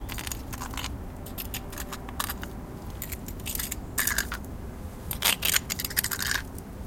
spoon on concrete
a series of takes with a spoon scraping up oatmeal on concrete. this took place because I was also recording splatter (less interesting) for some foley.
concrete, field-recording, metal, scrape, spoon